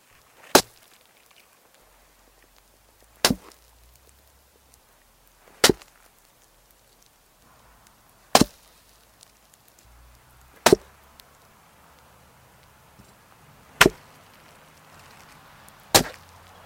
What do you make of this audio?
Knife/sword stab #2
A knife or sword being repeatedly stabbed into something...or someone.
knife, sword, stab, slash